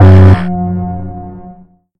This is a sound of a laser gun over heating